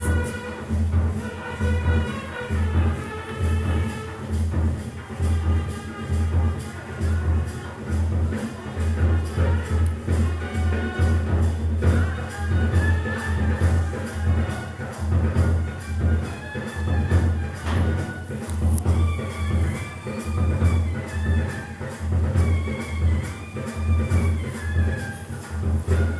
band biye
I recorded an wedding processing band party sound in my mobile in kolkata.